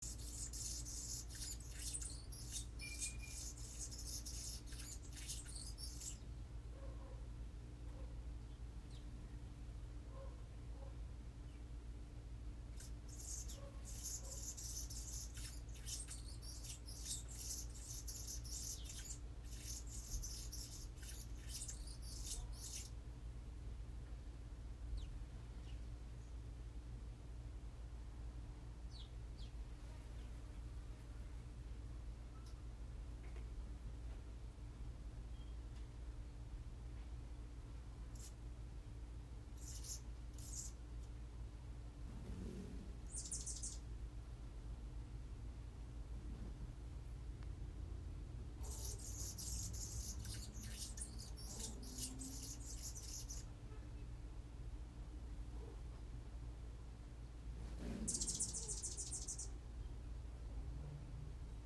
New Hummingbird Bully Raspy Song
The new hummingbird makes this sound/song to warn other hummingbirds to stay away from the feeder. It sounds very raspy. In the beginning, the neighbor whistles to his dog.
bird, birdsong, birds, nature, tweet, raspy